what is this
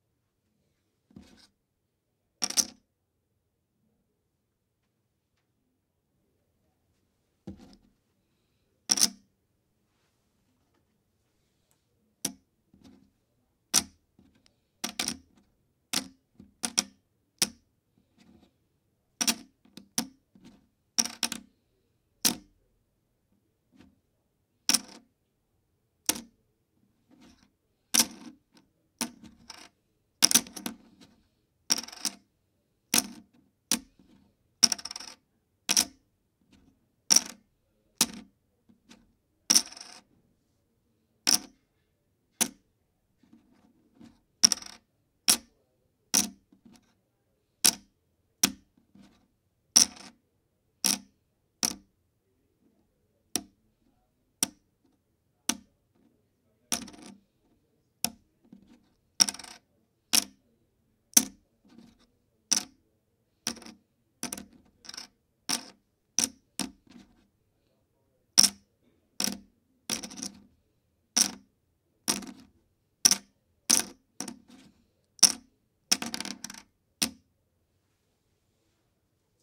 Placing plastic poker chips onto a wooden table.
poker-chips, poker, gambling